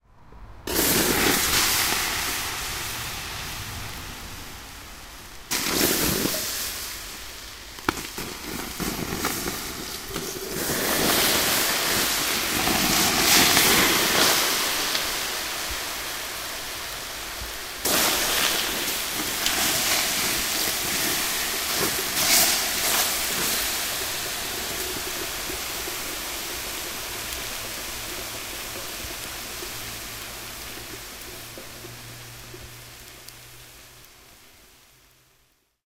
Water on Coal
Putting out the heat of the coal from a BBQ.
Recorded with Zoom H2. Edited with Audacity.
bbq, burning, coal, extinguish, firefighter, firefighting, grill, off, put-out, put-out-fire, quench, slake, steam, water